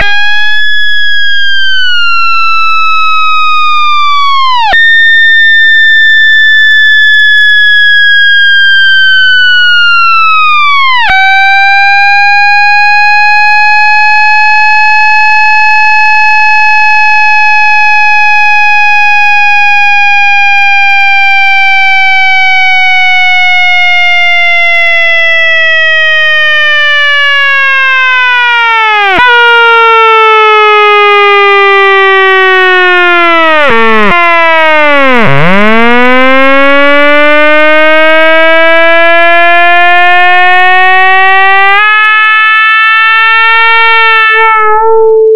a singing synth line with some chaotic jumps, ends with A 220hz
made from 2 sine oscillator frequency modulating each other and some variable controls.
programmed in ChucK programming language.